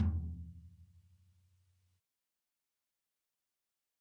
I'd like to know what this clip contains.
Dirty Tony's Tom 14'' 014
This is the Dirty Tony's Tom 14''. He recorded it at Johnny's studio, the only studio with a hole in the wall! It has been recorded with four mics, and this is the mix of all!
14, 14x10, drum, drumset, heavy, metal, pack, punk, raw, real, realistic, tom